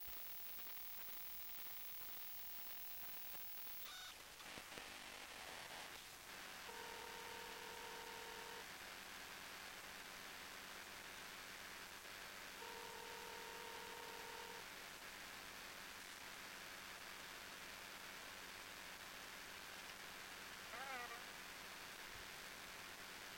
Cell Phone Outgoing Call EMP
The EMP of a cell phone making an outgoing call. Indoor. Recorded on Zoom H2 with contact mic.
call, cell, electronic, emp, field-recording, going, magnetic, out, outgoing, phone, pulse, ringing